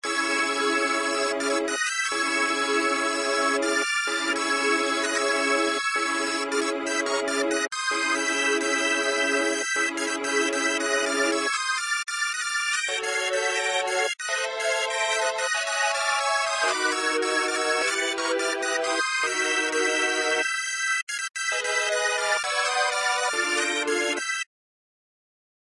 with us
chilloutdance, club, dj, downbeat, downtempo, drum, drum-loop, filter, hardcore, hip, hip-hop, hiphop, oriental, phat, producer, slow